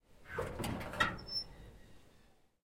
Found an old abandoned truck on a hike - recorded the squeaking and creaking of the doors opening and closing and stressing different parts of the metal. (It was done outdoors, so there may be some birds)